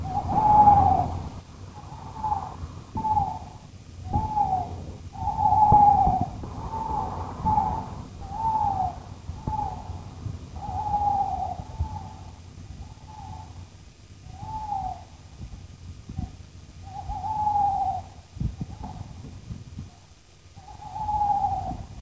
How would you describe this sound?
An owl
Freemaster